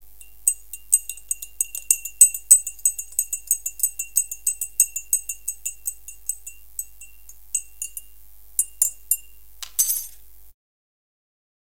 cay kasigi kisaltilmis HQ
spoon, tea, record, bad